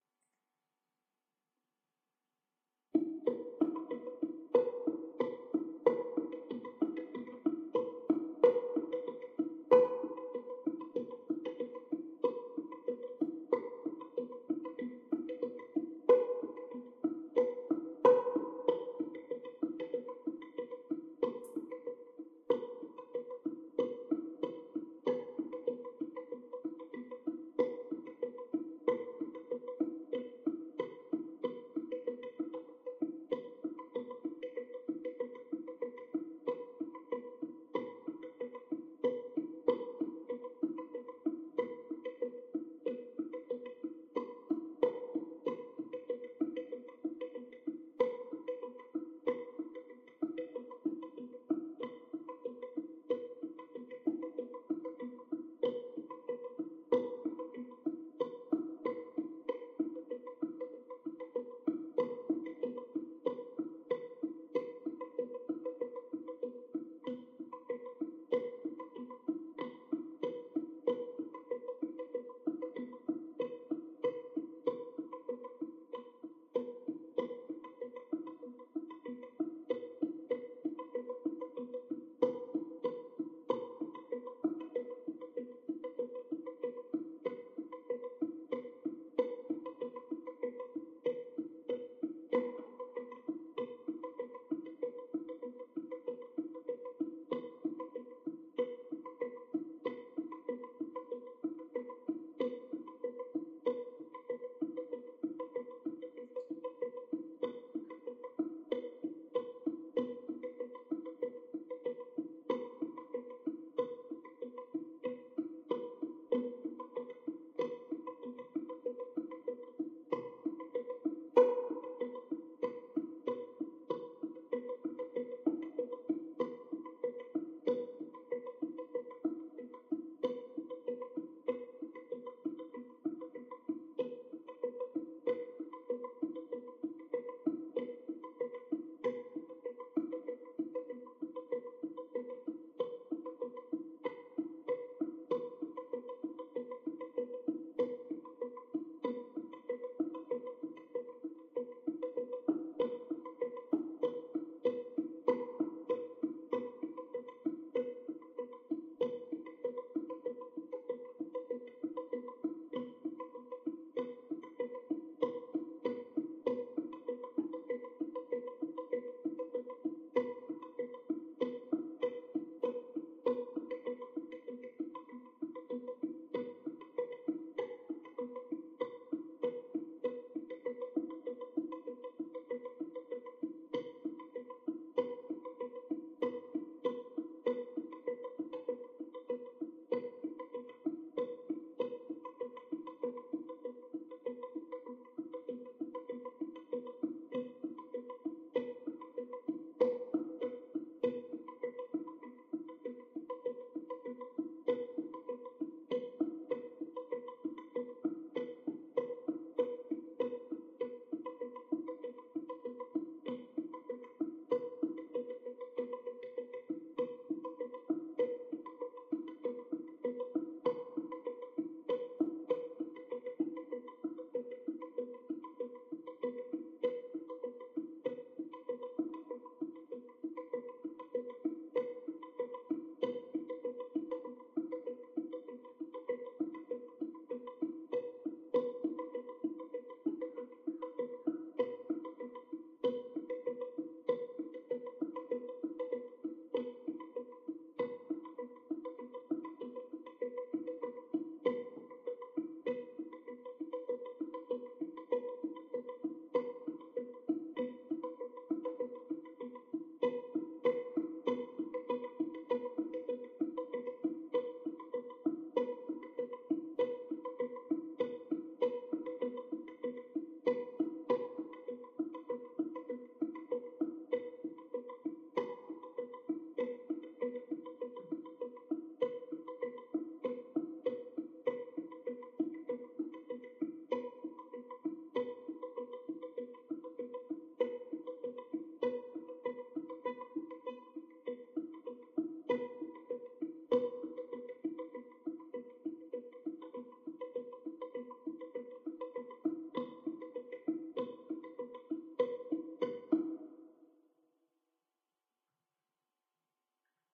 Ditty bump jam mixdown 01
A 5 minute sound file made by muting the strings of one of my banjos and playing a small range of finger patterns in mixed order. Recorded direct to my computer with a few Adobe Auditions effects added to enhance the sound. A great fit to a short story or thought-provoking piece of work.